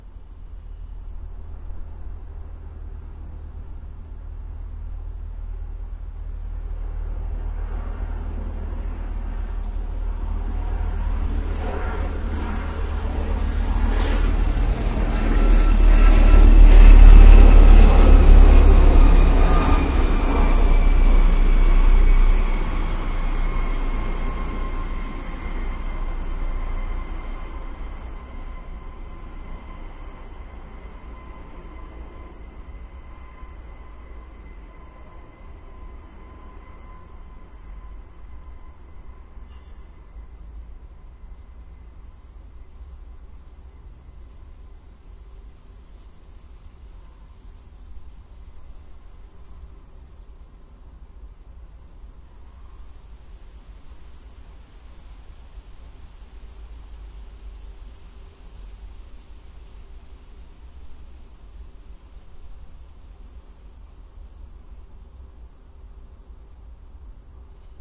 A helicopter flying over. Primo EM172 microphones into R-09HR recorder.